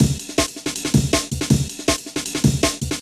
Mecha-Jazz
Funky amen break created by sequencing individual hits.
beat, break, breakbeat, breaks, dnb, drumandbass, drums, funk, hip-hop, jungle, loop